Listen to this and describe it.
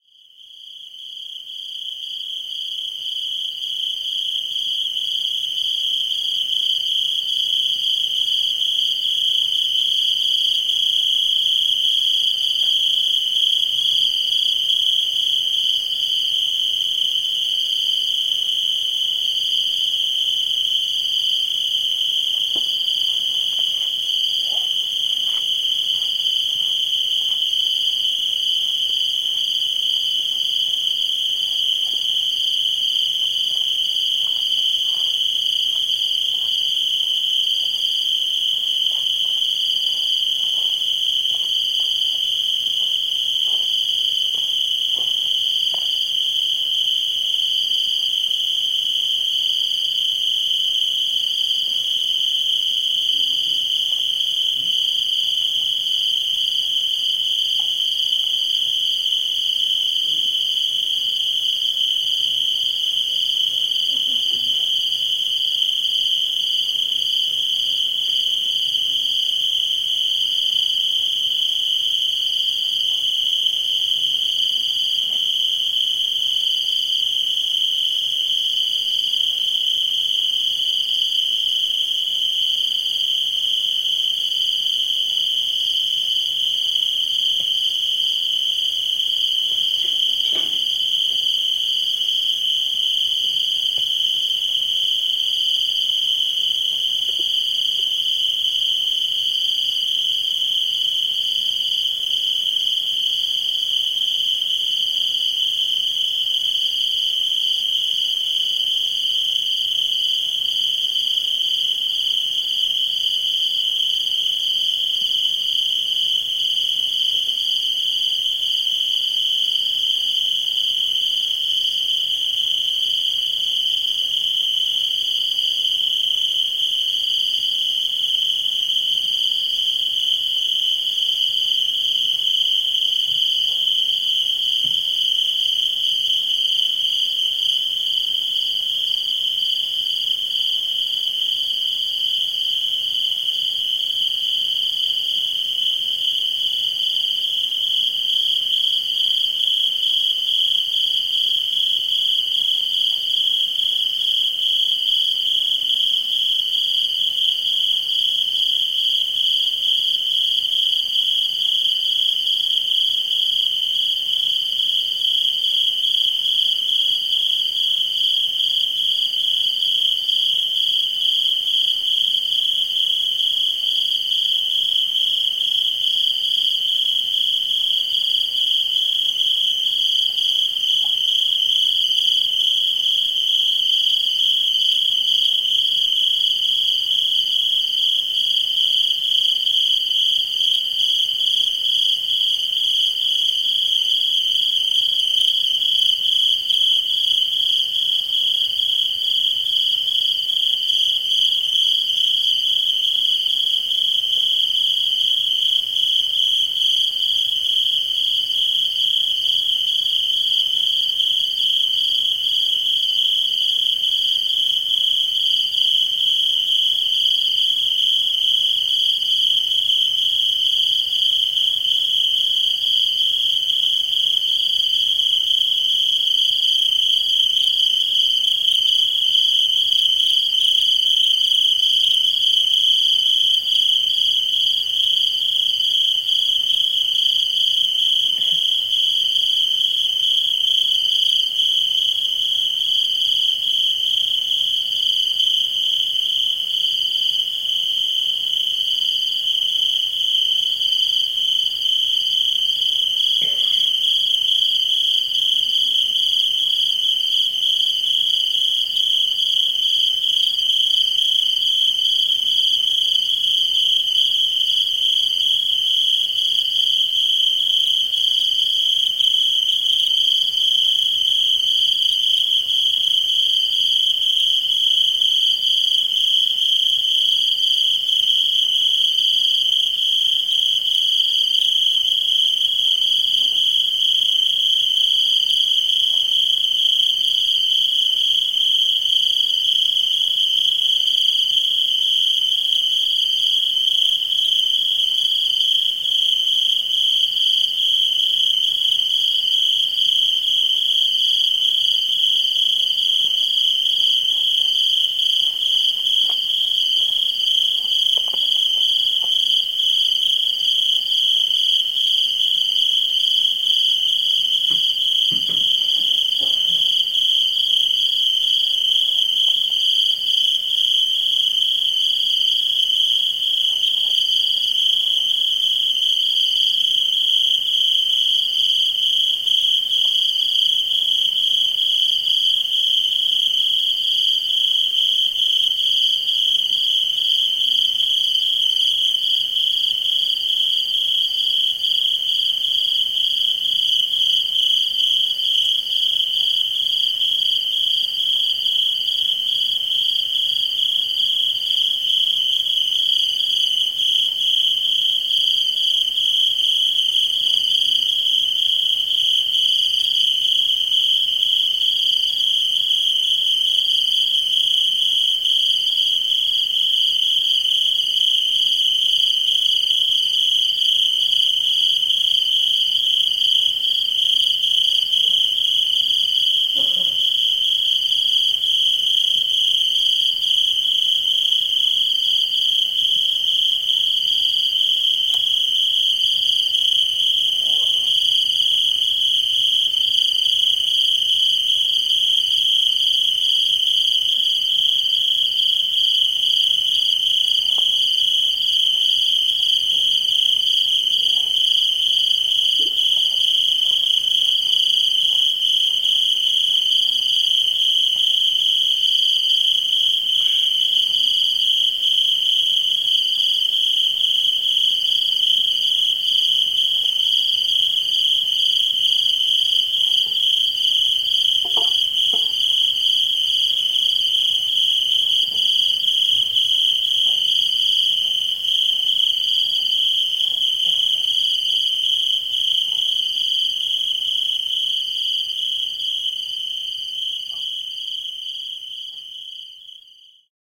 Night Air at Purlkurrji
A night atmos recorded 100 metres from our camp. Mostly crickets a light breeze and the occasional camp clatter.
An MS stereo recording done with a sennheiser MKH416 paired with a MKH 30 into a Zoom H4n and “sweetened” in Reaper.
atmos, australia, field-recording, outback, soundscape